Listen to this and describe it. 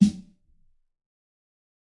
fat snare of god 004
This is a realistic snare I've made mixing various sounds. This time it sounds fatter